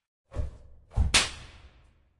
This whip effect is made up of two elements - the whoosh sound is a USB direct link cable twirled in the air. The Pop is a shortened recording of a BlackCat small firecracker - both recorded with a Sony ECM-99 Stereo microphone to SonyMD. A re-verb was added to increase a feeling of depth.
soundeffect, effect, environmental-sounds-research, field-recording